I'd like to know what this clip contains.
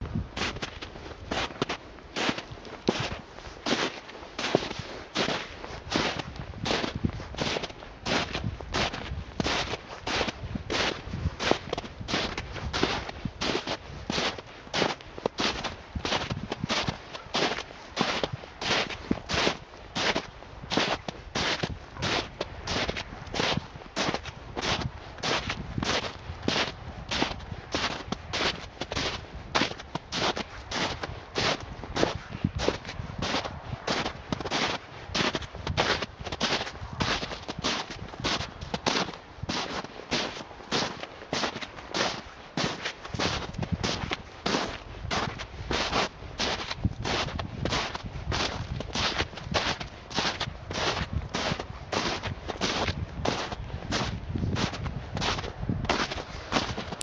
Walking in snow P1060897
Walking through slowly thawing snow